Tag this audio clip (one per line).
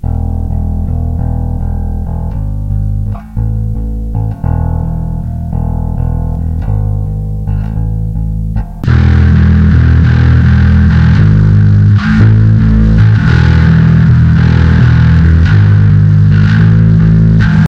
Bass
Bass-Line
Guitar
Live